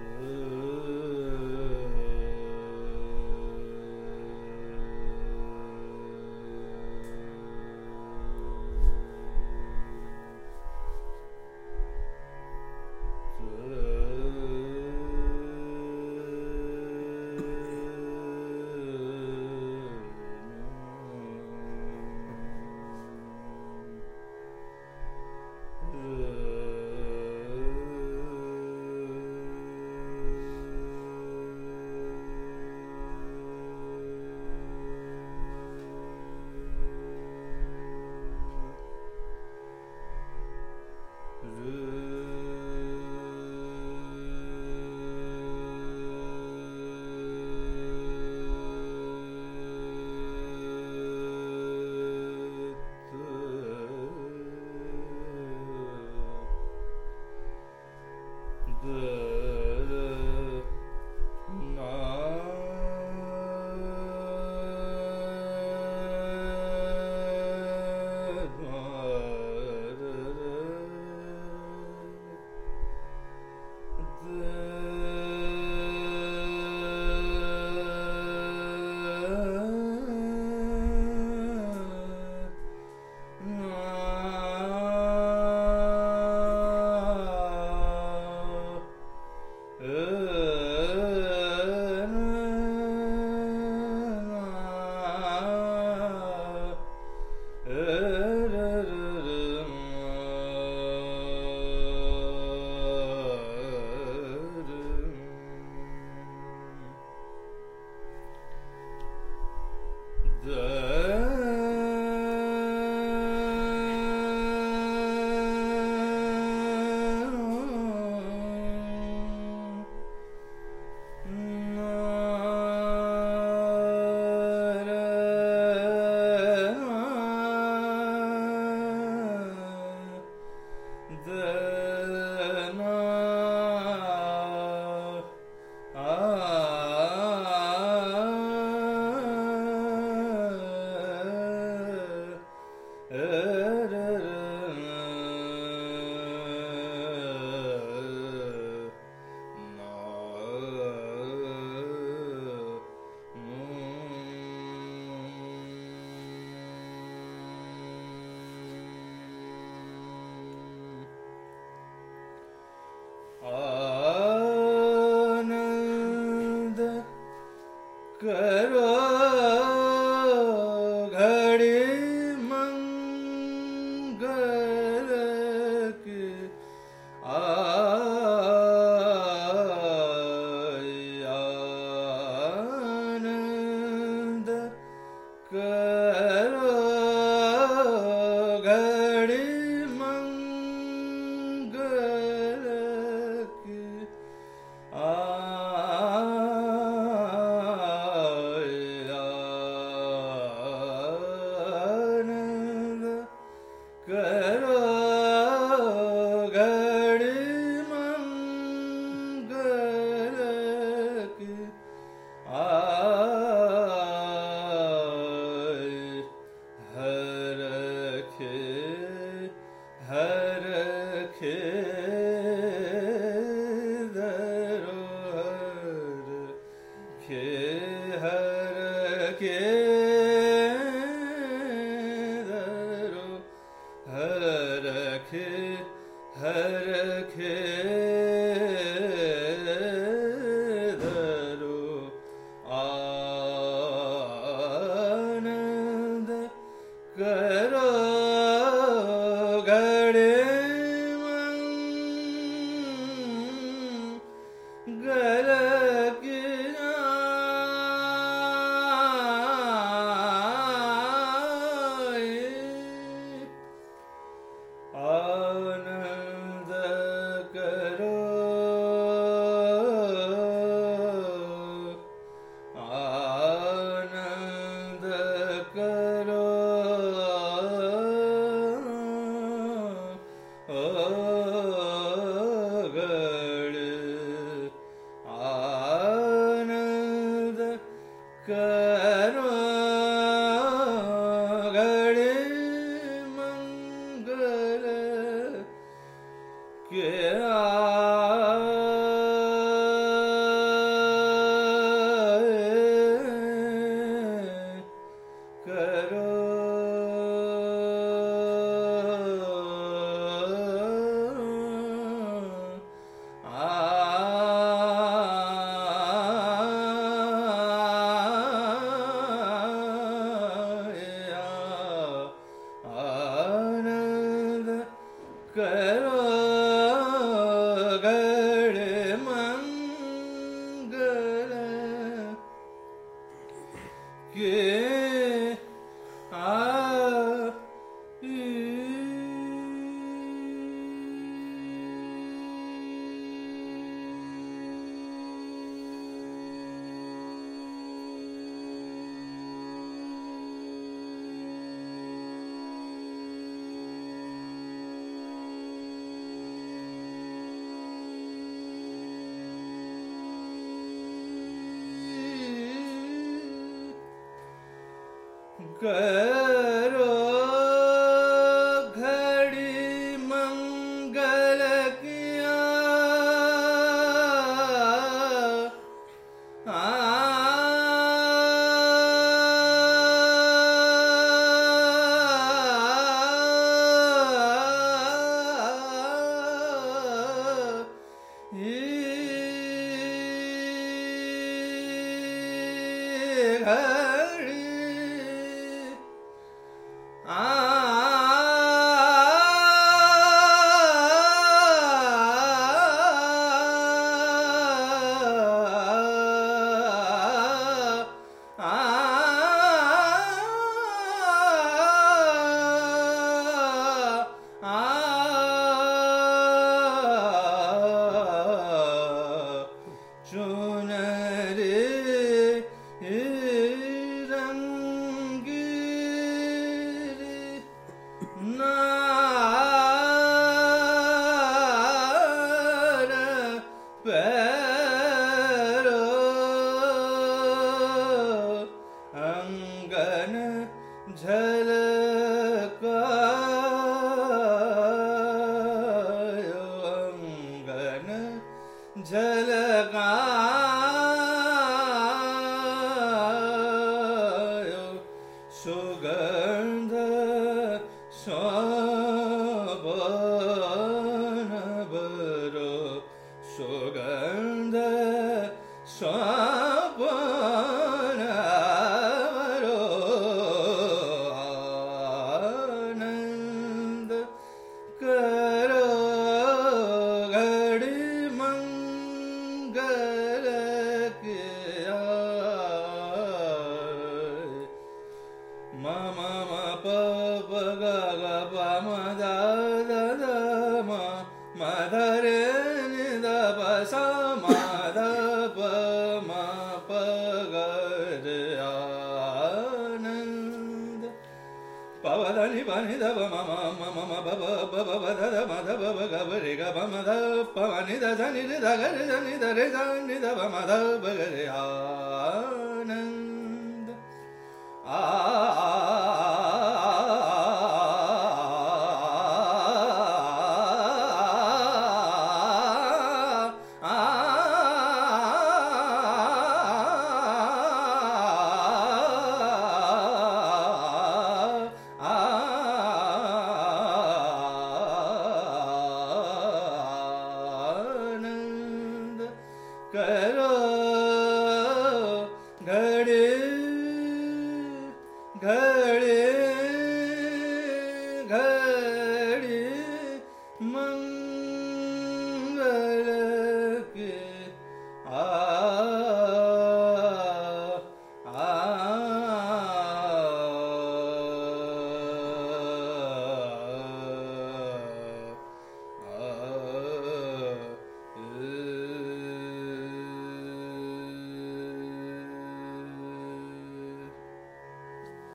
Kaustuv Rag-Bhatiyar
This rendition was performed at a get-together at Xavier Serra's residence.
The Bandish lyrics are : Ananda Karo Ghadi Mangal Ki Aayi. The sound was recorded using a Sony PCM D-50 recorder.
Hindustani, CompMusic, Bhatiyar